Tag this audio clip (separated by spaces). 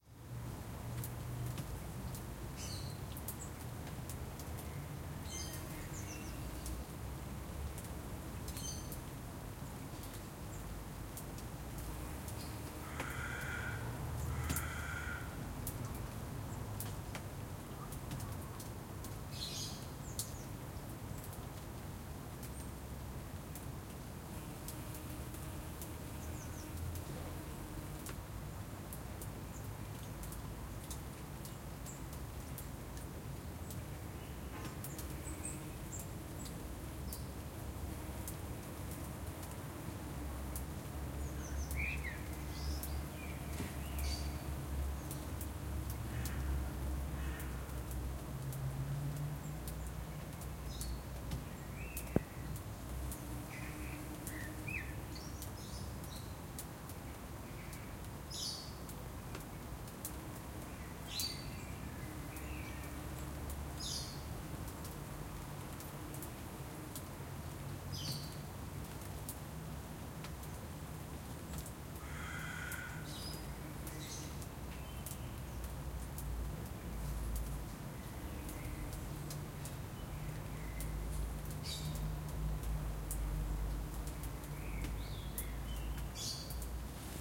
ambiance amb ambience Netherlands Groningen Holland city general-noise ambient soundscape